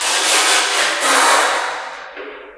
Bonks, bashes and scrapes recorded in a hospital at night.